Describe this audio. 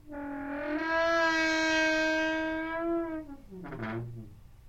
Door creaking.
Mic: Pro Audio VT-7
ADC: M-Audio Fast Track Ultra 8R
See more in the package doorCreaking
creaking, door, door-creaking, noise